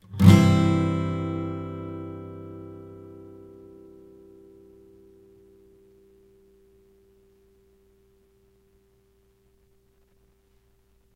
Yamaha acoustic guitar strummed with metal pick into B1.
acoustic, amaha, c, chord, guitar